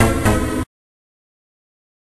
hit impact gamesound sfx game fx
fx, game, gamesound, hit, impact, sfx